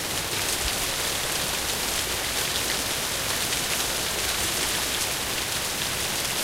Heavy rain. Works well if looped.
Recorded to tape with a JVC M-201 microphone around mid 1990s.
Recording was done through my open window at home (in southwest Sweden) while this storm passed.